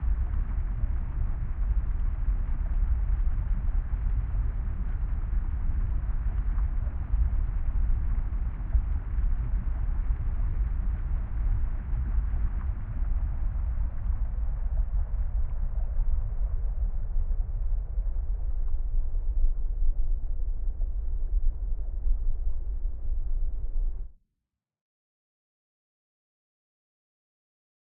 Underwater and going lower CsG
alchemy, bubble, bubbles, bubbling, liquid, sinking, sounddesign, underwater, water